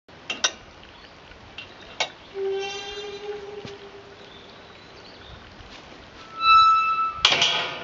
Gate closing
Someone opening a squeaky metal cattle gate and letting it fall shut. A small brook can be heard in the background. Unedited field recording with a Panasonic Lumix camera.